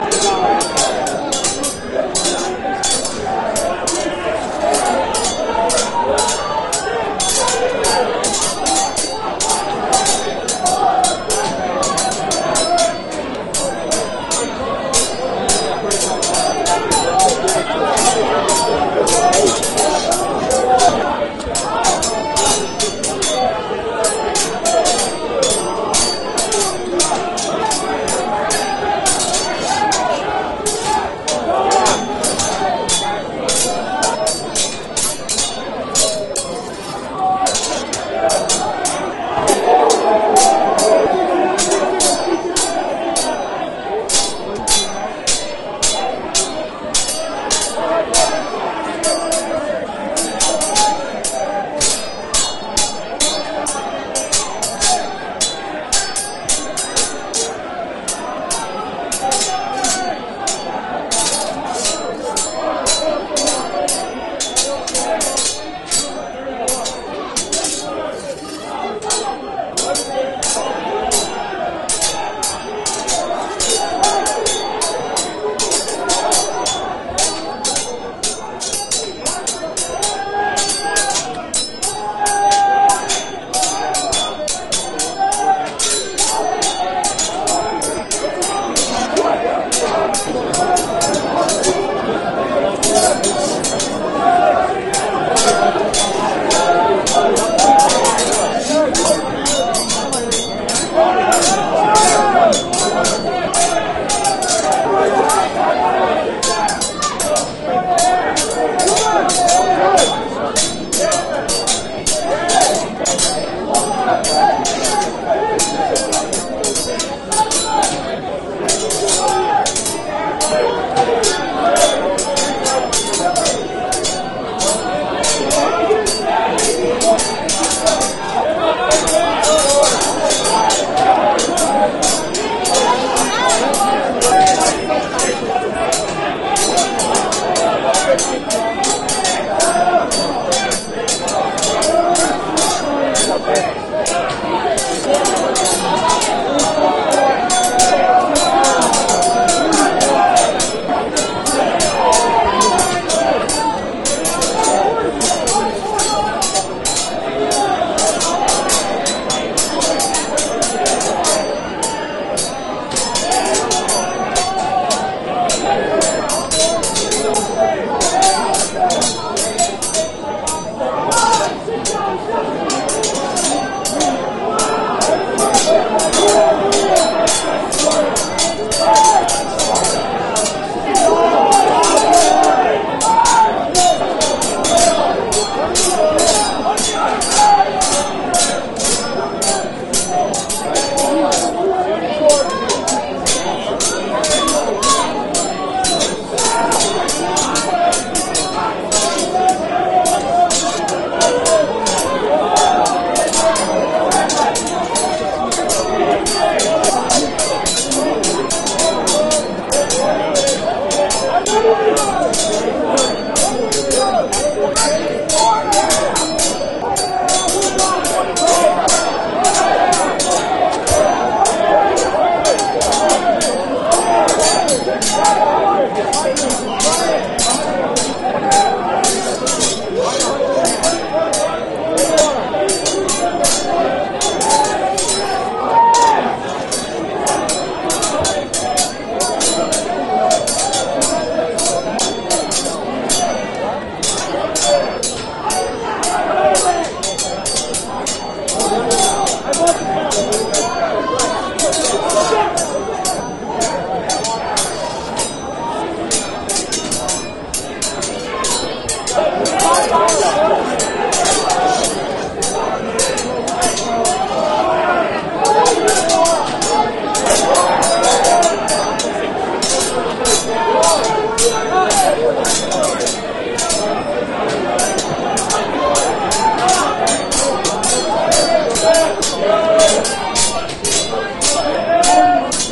Ambient battle noise: swords and shouting
The ambient battle noise of medieval warfare: angry men shouting at each other and clanging their swords together. Mixed together (and re-published with permission) from the following sources:
(Yes, the angry men shouting is actually taken from the stock exchange. Note that this might work best layered with music, to help mask this fact.)
battle, swords, fighting, shouting, clanging, warfare, medieval, sword, angry, yelling, knights, fight